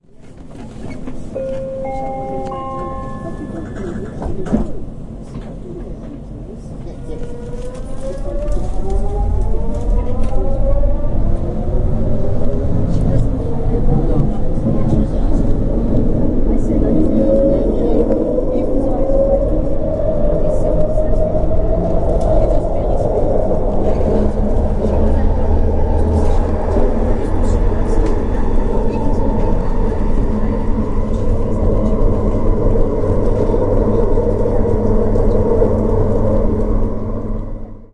Vancouver sky train 256 cbr
fx, sound